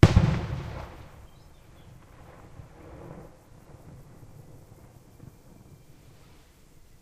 Exploding firework in the distance.
bang, crack, distant, echo, field-recording